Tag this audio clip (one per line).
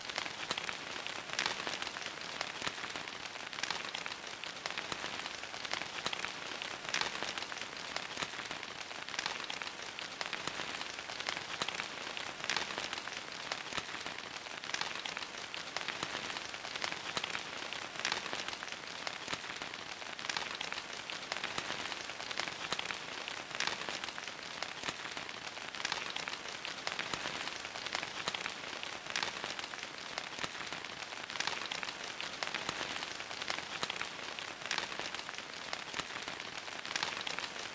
atmoshpere
fx
rain
sounddesign
soundeffect
stereo
texture
water
weather